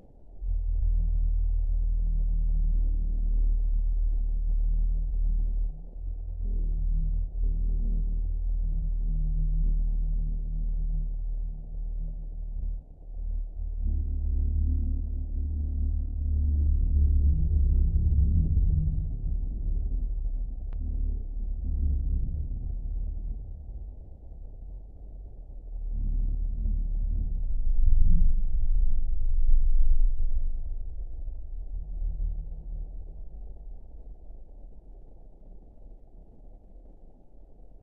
cymbal lo06
A few very strange tracks, from a down-pitched cymbal.
ambience, cymbal, horror, low, noise, processed, scream